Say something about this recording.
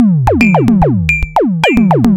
110 bpm FM Rhythm -02
A rhythmic loop created with an ensemble from the Reaktor
User Library. This loop has a nice electro feel and the typical higher
frequency bell like content of frequency modulation. Some low frequency
toms and a little bell sound. The tempo is 110 bpm and it lasts 1 measure 4/4. Mastered within Cubase SX and Wavelab using several plugins.
110-bpm, electronic, fm, loop, rhythmic